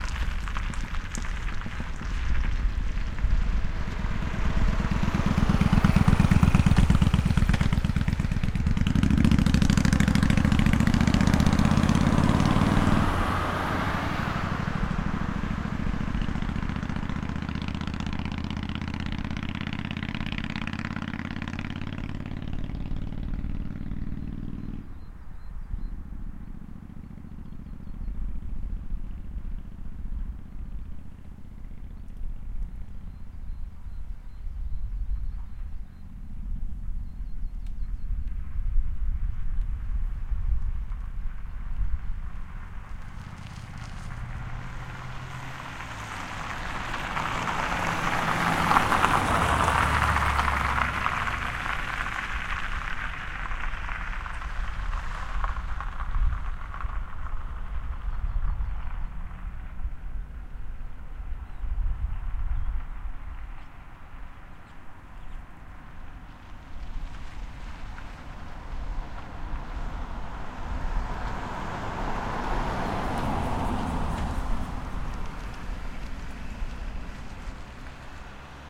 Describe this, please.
A recording of a road that is some meters from our house. Some cars and a motorbike is passing by.
motorbike, road, traffic, way